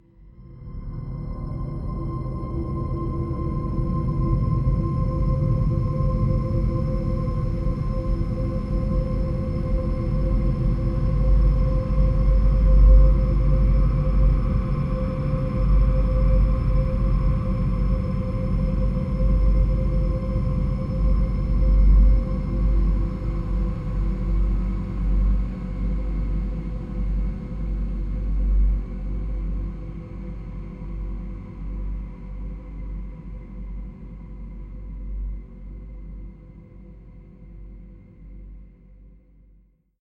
LAYERS 023 - Thin Cloud-96
LAYERS 023 - Thin Cloud is an extensive multisample packages where all the keys of the keyboard were sampled totalling 128 samples. Also normalisation was applied to each sample. I layered the following: a thin created with NI Absynth 5, a high frequency resonance from NI FM8, another self recorded soundscape edited within NI Kontakt and a synth sound from Camel Alchemy. All sounds were self created and convoluted in several ways (separately and mixed down). The result is a cloudy cinematic soundscape from outer space. Very suitable for soundtracks or installations.
space, cinimatic, multisample, soundscape